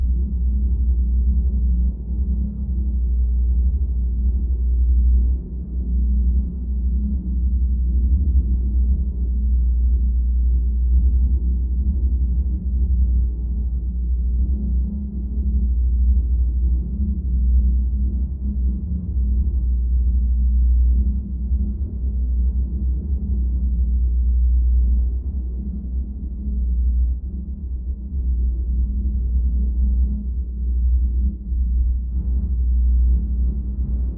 This is the "Original Ambience Sound Unaltered" file with some reverb, EQ and distortion on it. I achieved this pretty airplane-like sound. Like when you're inside of an airplane.